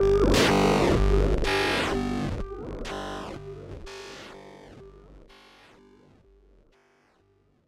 Glitch Pulse 125
A glitchy buzz synth